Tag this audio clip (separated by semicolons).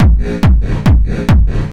bass; hardstyle; reversed